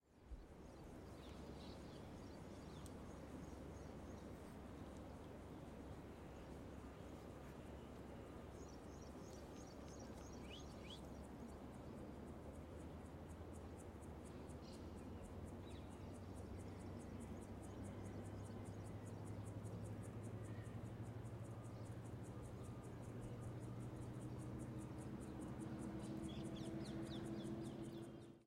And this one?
Morning in Yarkon park - Tel Aviv Israel
A park ambience during a quiet morning. Birds and distant traffic can be heard. Recorded in Yarkon Park, Tel Aviv, Israel 2019. Recorded with Audio-Technica boom mic on a Tascam DR60dM2.
amb, ambience, birds, day, exterior, israel, morning, nature, park, reserve, room-tone, tel-aviv, trees, wind, yarkon